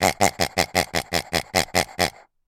Squeaky pig dog toy snorting squeal oink grunt 006
Several grunts from a rubber dog toy
dog, plastic, rubber, squark, squeak, toy